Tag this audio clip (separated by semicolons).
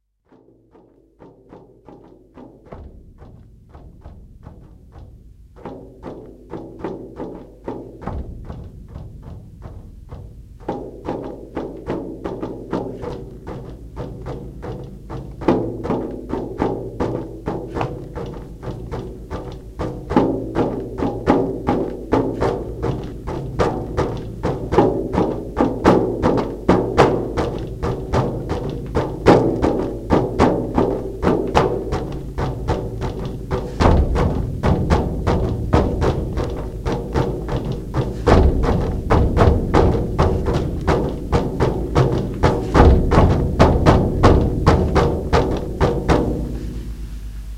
drum; experimental; rythms